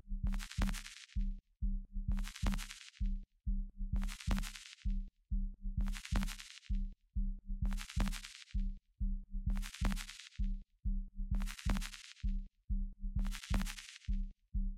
130 bpm C Key 04
hard, key, rhythmic, techno